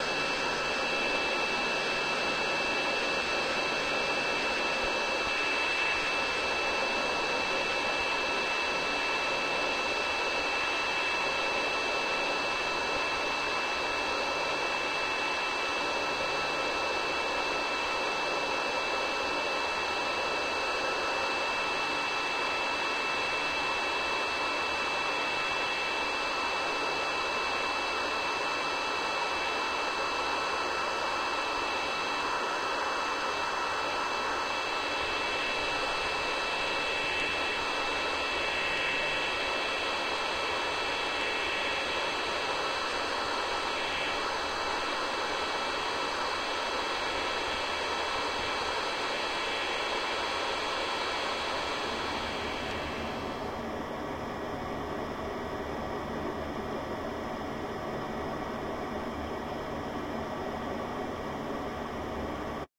airplane-interior-volo-inflight HI fq (window)
this bank contains some cabin recordings by a contact mic placed in different locations.
recorded by a DY piezo mic+ Zoom H2m
air-berlin, cabin, mic, window